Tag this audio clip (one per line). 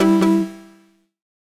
abstract
alarm
beep
button
computer
digital
freaky
push
resonancen
sound-design
splash
typing
weird